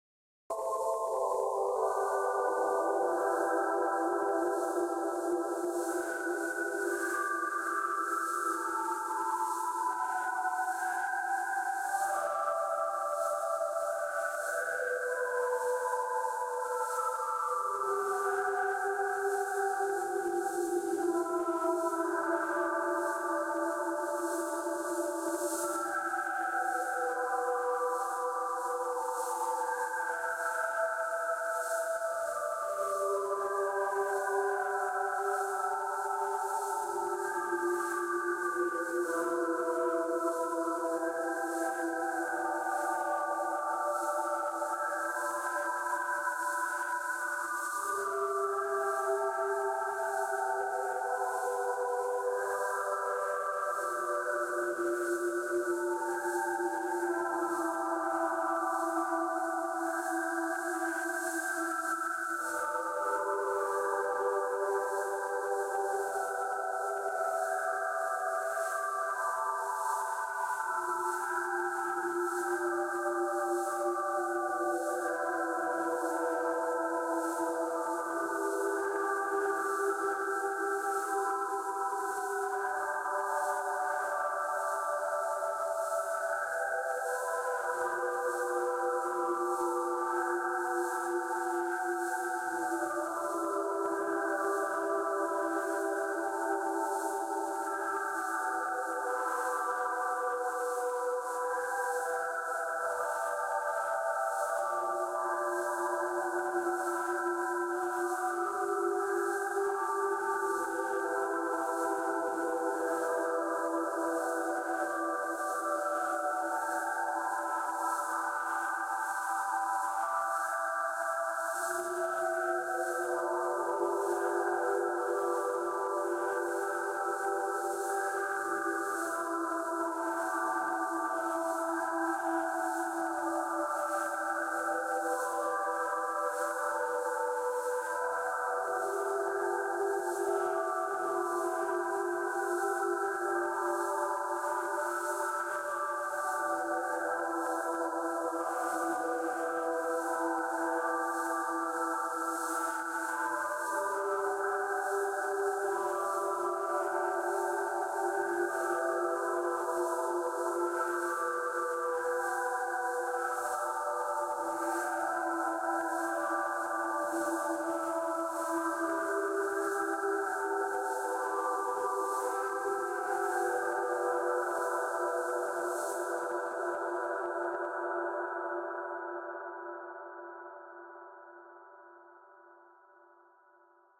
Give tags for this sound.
ambiance
ambience
ambient
atmos
atmosphere
background-sound
cave
creepy
dark
deep
dripping
dripping-cave
sinister
soundscape
stereo
terrifying
thrill

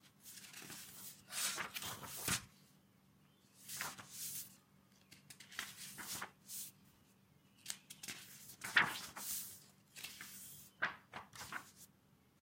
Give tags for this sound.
book
books
OWI
page
Pages

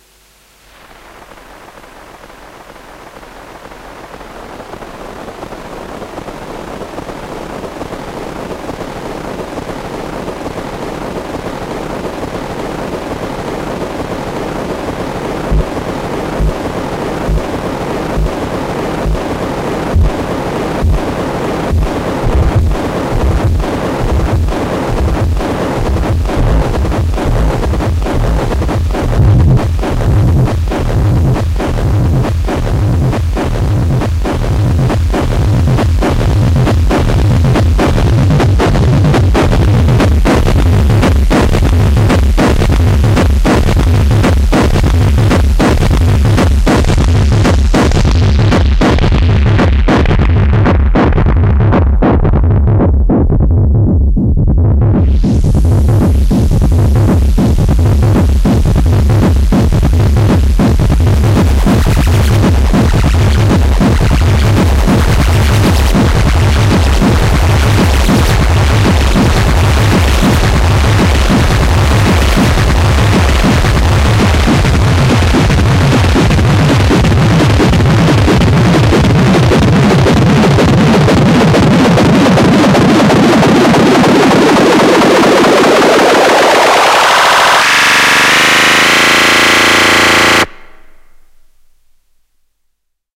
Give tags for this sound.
analog
audio
crazy
ghosts
horror
movie
old
sound
synthesis

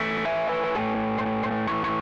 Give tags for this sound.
loop
gtr
guitar
overdrive
buzz
distortion
120bpm

